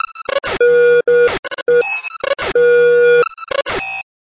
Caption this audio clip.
Glitch sound.
This pack of sounds and transitions is made using the software "Ableton Live" and it is completely digital, without live recording. Exceptionally sound design. Made in early autumn of 2017. It is ideal for any video and motion design work. I made it as a sign of respect for my friends working with Videohive.